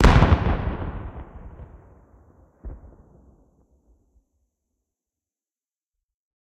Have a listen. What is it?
Explosion sound made of some old firework recordings.
Bang, Blast, Bomb, Boom, Detonate, Detonation